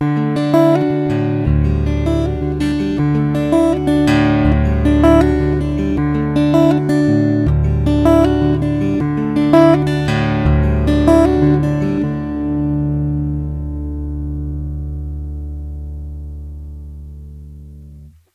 Acoustic D Major picking 80bpm
Taylor acoustic guitar direct to desk.